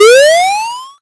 8-bit boing
A simple 8-bit sounding "boing" you might hear in something like a Mario game.
I used Audacity to create and edit a Square Chirp.
boing, 8-bit, square-chirp, audacity